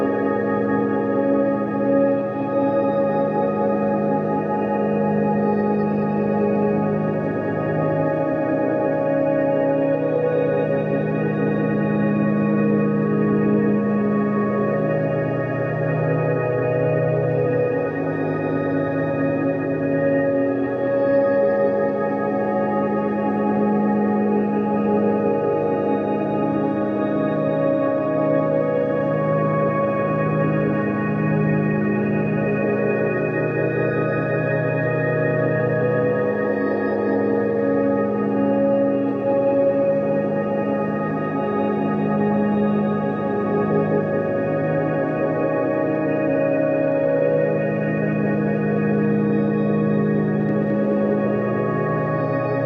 Once upon a time, this was some nice chords from my Rhodes. But now it is a granular drone mess.
atmosphere, ambient, drone, rhodes